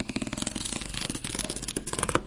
grattement sur un carton alveolé